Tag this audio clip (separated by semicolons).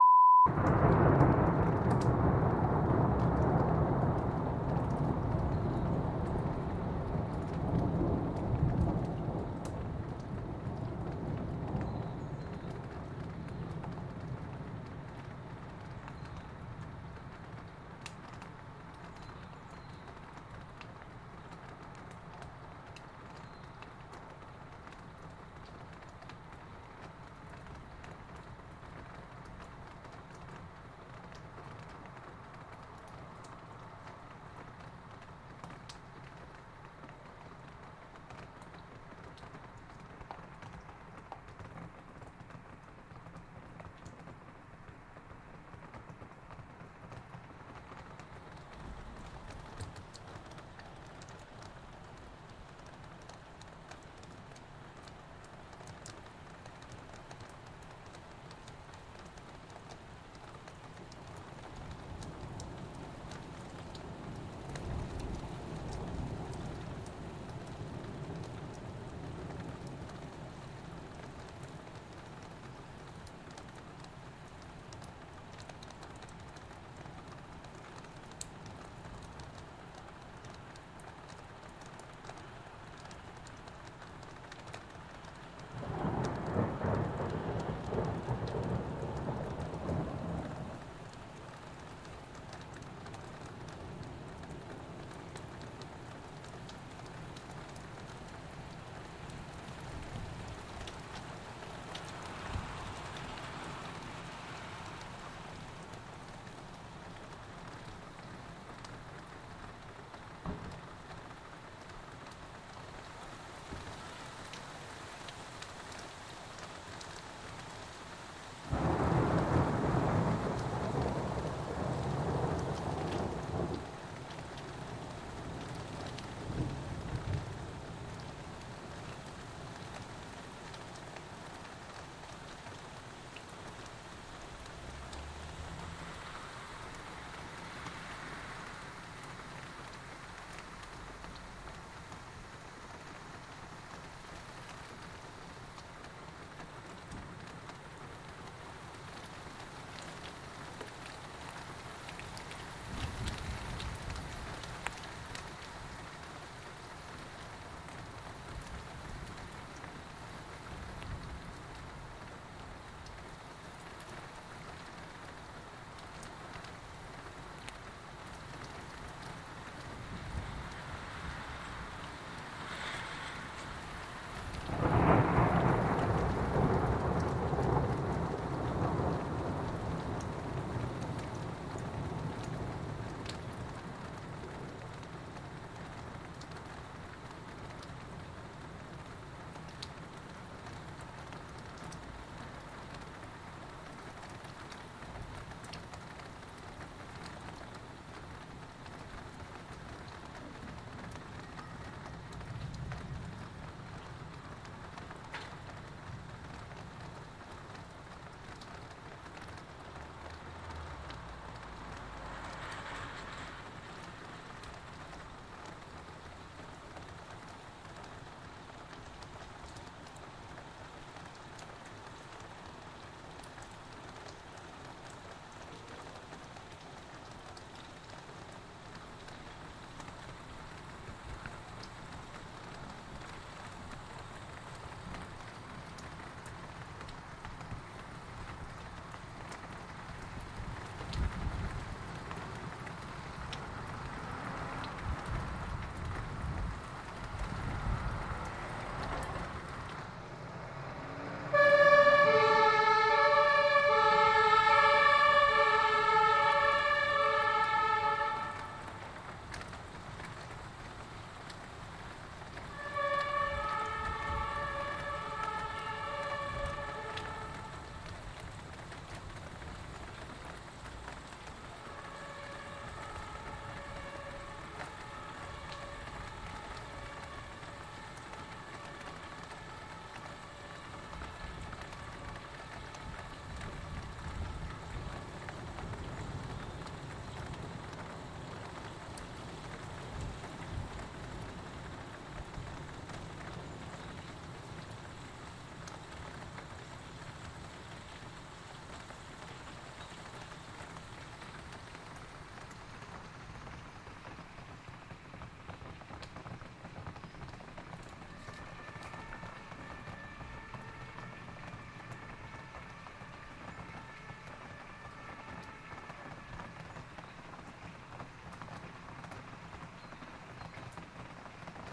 Lightning; Thunderstorm; Rain; Wind